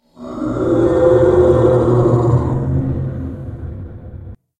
This one is a combination of the plastic soda straw sounds rubbing through the lid of a plastic cup, and some of my vocalizations. Again, it all gets the Audacity pitch-lowering and gverb treatment.
snarl, monster, howl, growl, scream, roar